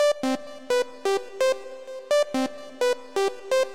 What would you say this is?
Good for any kind of edm type of music.
Enjoy :)
house, techno, progressive